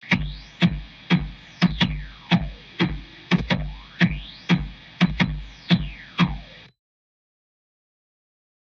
Percussion Loop1
A repeatable drum loop created using a Pure Leaf tea bottle, and a Samson USB studio microphone. Recorded on 8/22/15. Altered using Mixcraft 5.
altered, bottle, cleaner, container, drum-loop, drums, improvised, percussion-loop, percussive, recording